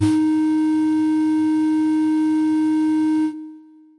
Inspired by the Synth Secrets article "Synthesizing Pan Pipes" from Sound on Sound, I created this. It's completely unrealistic, I know. This is the note D sharp in octave 4. (Created with AudioSauna.)